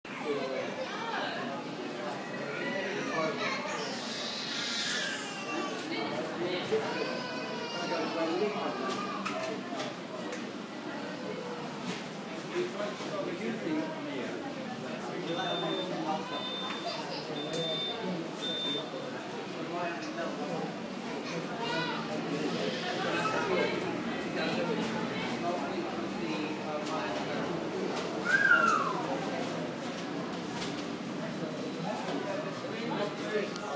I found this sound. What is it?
Public Place Children Indoors
public open space with children playing
interior, children, inside, laugh, chat, scream, talking, people